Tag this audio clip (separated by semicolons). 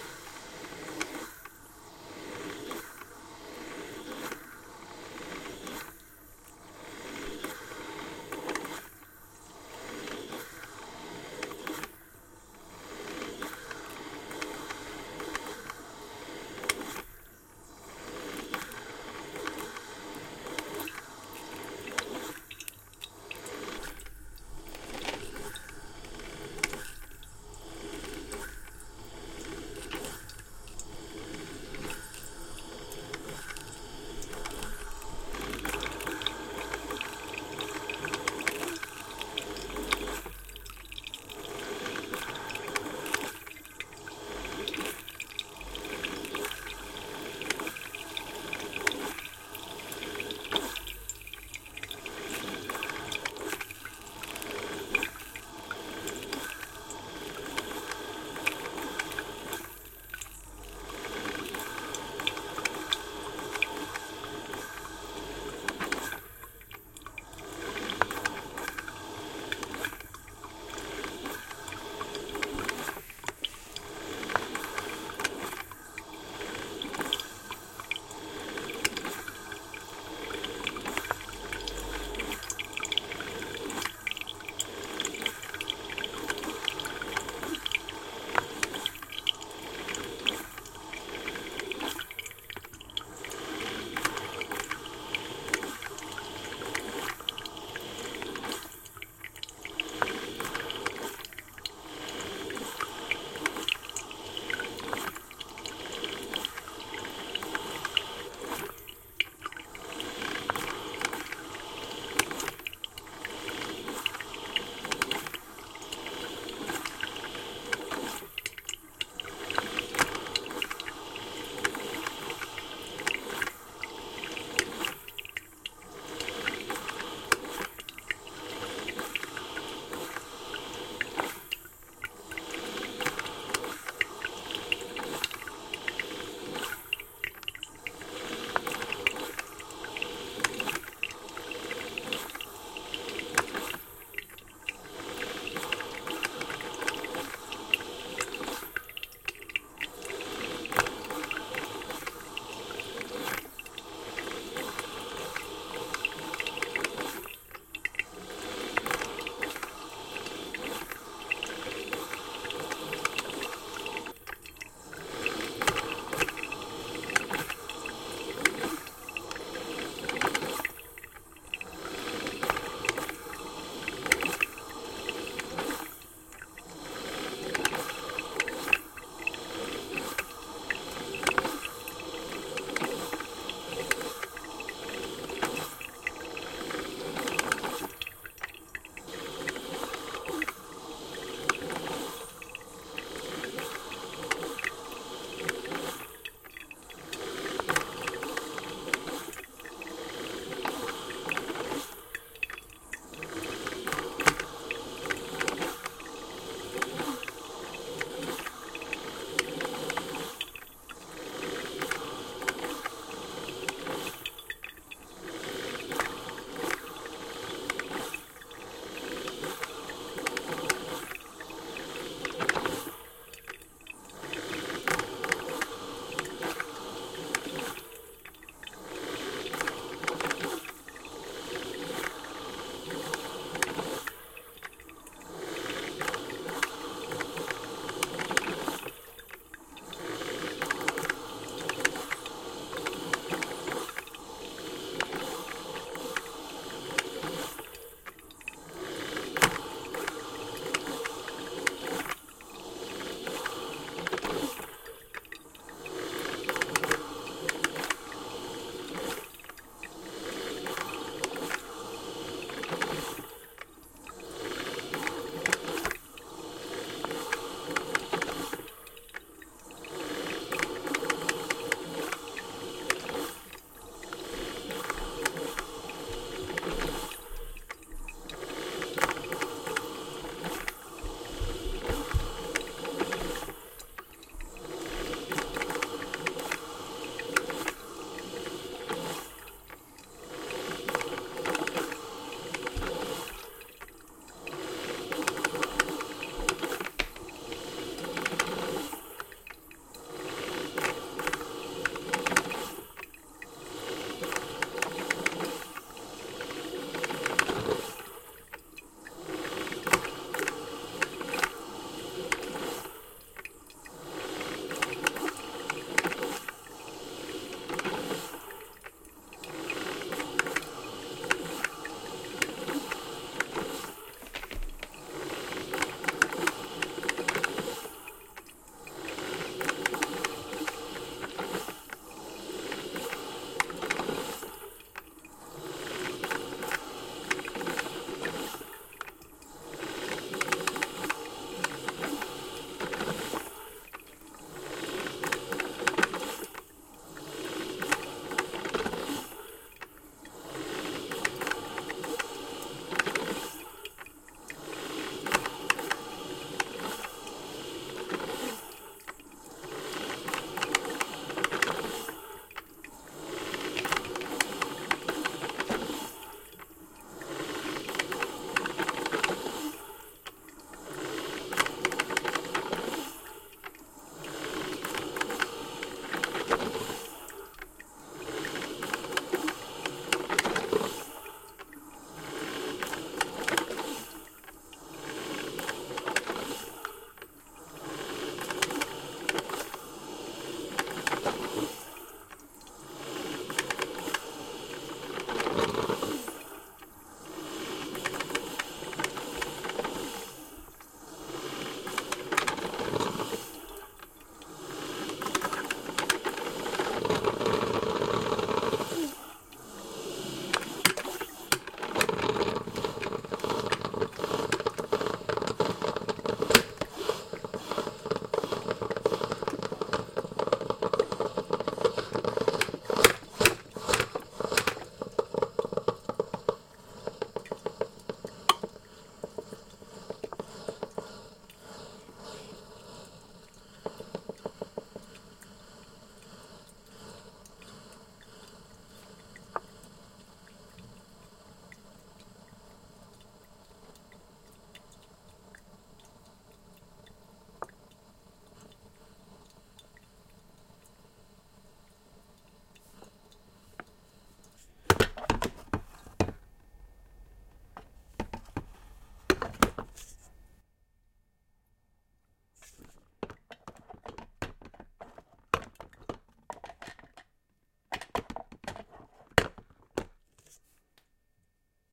boil; boiler; boiling; coffee; cooking; heating; hot; kettle; kitchen; machine; maker; water